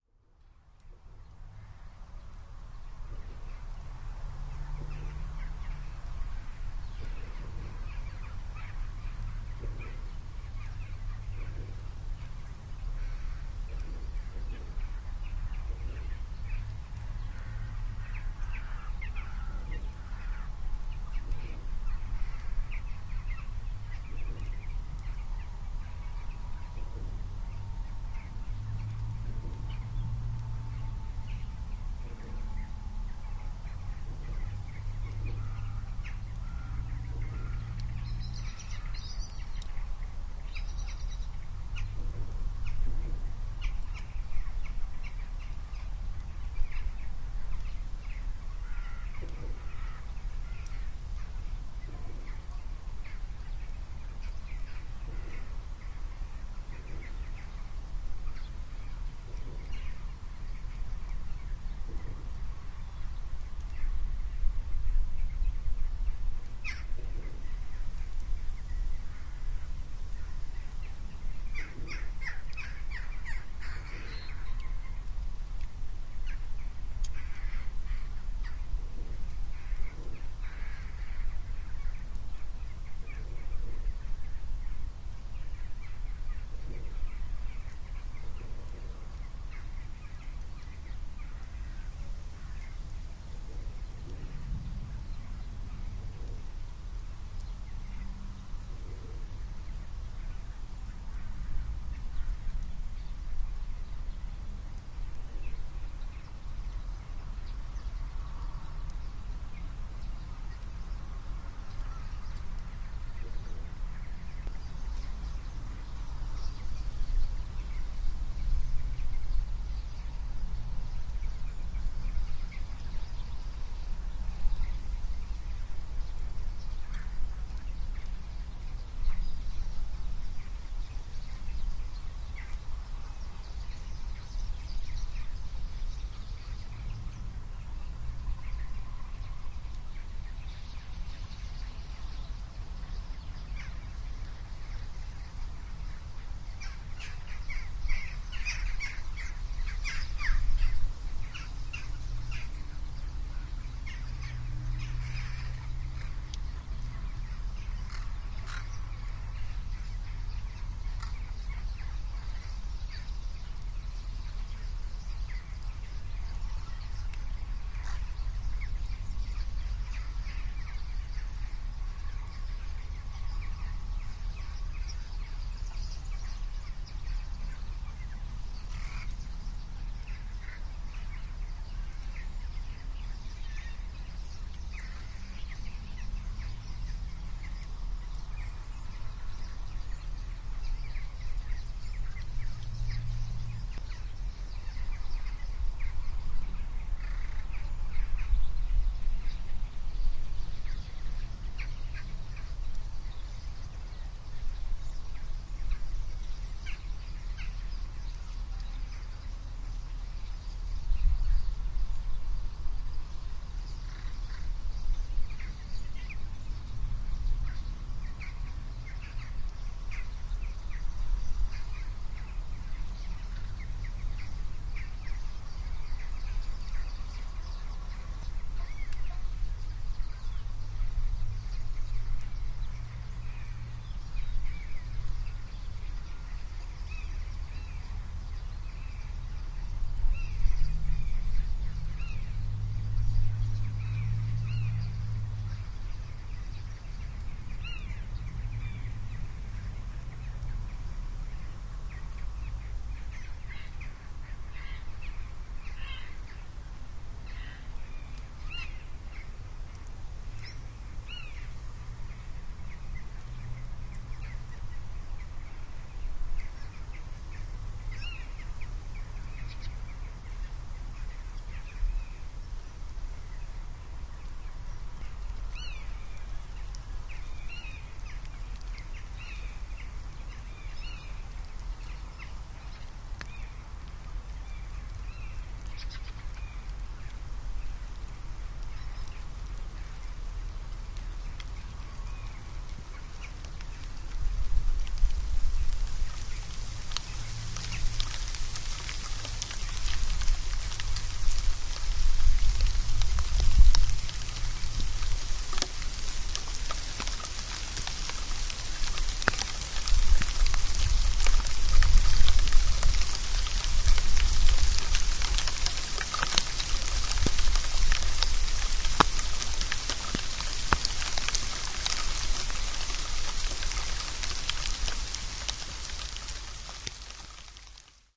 Tuesday afternoon outdoors
A Tuesday afternoon outside in my garden, in the country. There is some distant traffic, some birds flying by, and suddenly from a clear sky there's rain.
Recorded with a TSM PR1 portable digital recorder, with external stereo microphones. Edited in Audacity 1.3.5-beta.